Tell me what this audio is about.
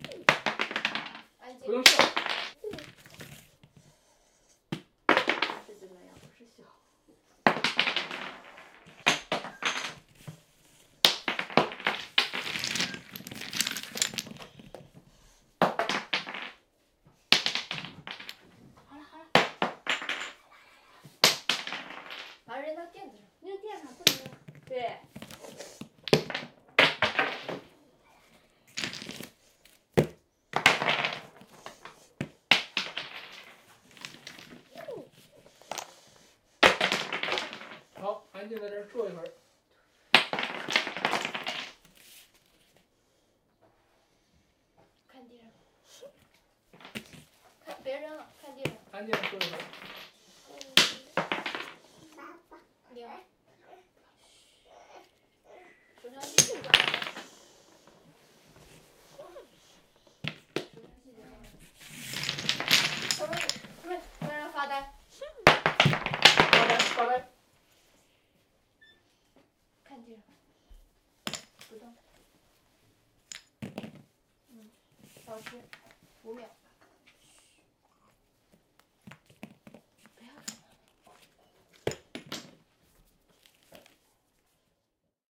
Throw-wooden-toy-blocks
child, sfx, wooden-toy-blocks